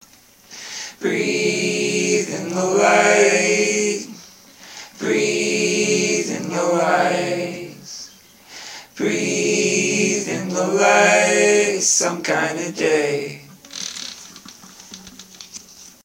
GRJHH Vocals
A collection of samples/loops intended for personal and commercial music production. For use
All compositions where written and performed by
Chris S. Bacon on Home Sick Recordings. Take things, shake things, make things.
guitar, looping, whistle, original-music, rock, acoustic-guitar, bass, piano, harmony, Folk, samples, synth, sounds, drums, indie, melody, percussion, loop, free, Indie-folk, acapella, beat, loops, drum-beat, vocal-loops, voice